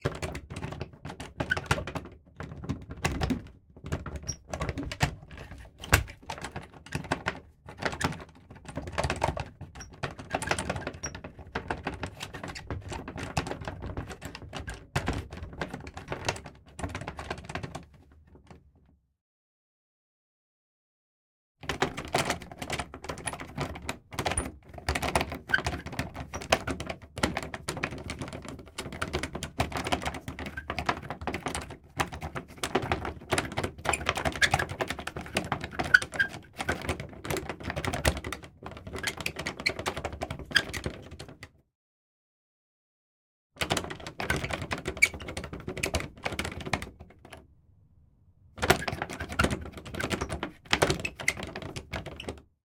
door knob rattling wood door
wood, door, knob, rattling